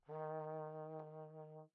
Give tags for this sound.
brass; dsharp2; midi-note-39; multisample; oldtrombone; single-note; vibrato; vsco-2

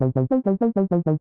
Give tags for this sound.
arp; boop; bop

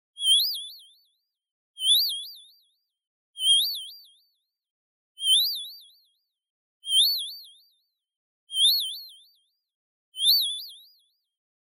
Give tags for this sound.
wobble; sine; synthesizer; vibrato; massive; square; synth; synthesiser

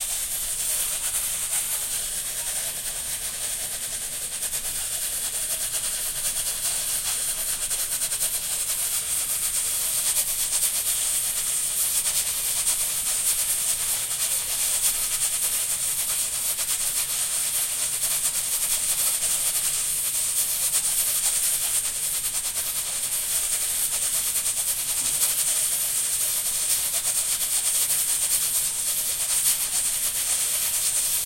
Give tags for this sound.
Cooker; cooking; Kitchen; Pressure; supply

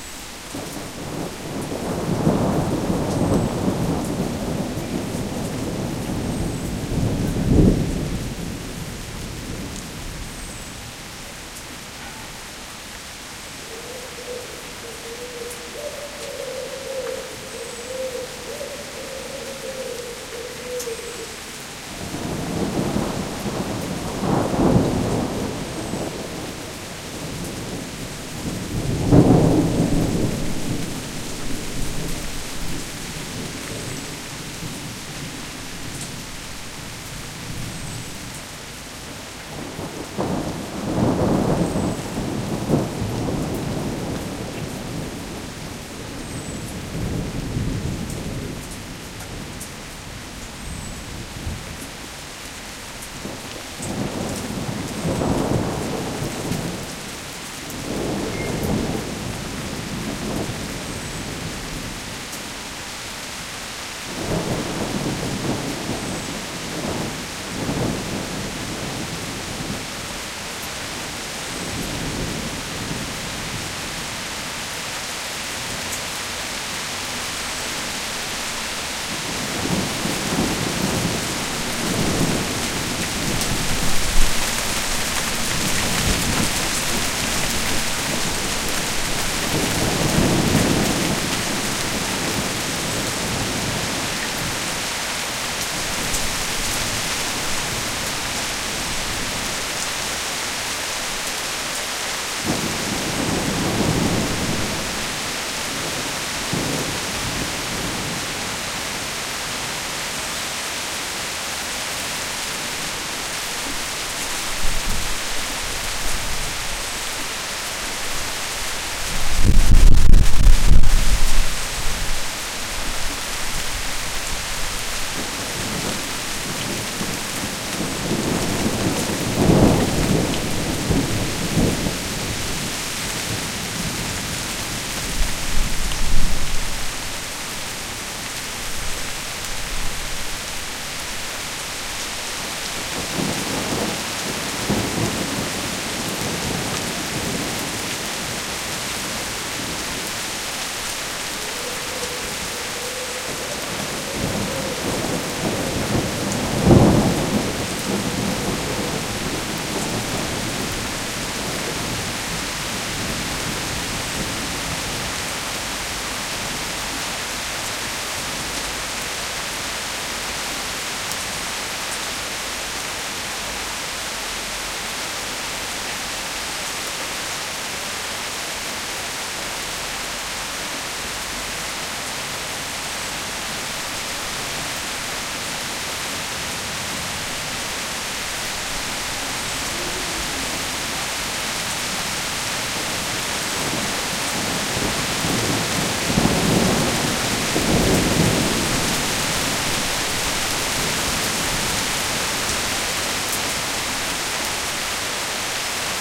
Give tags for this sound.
athmosphere; thunderstorm; thunder; field-recording

